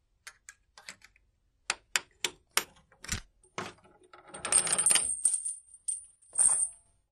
You unlock a chain and pull it off something - a sound effect for an online game I and my nine-year-old brother made:
chain, chains, clatter, clink, jingle, jingling, key, keychain, links, lock-pick, loose, metal, release, tinkle, unchain, unlock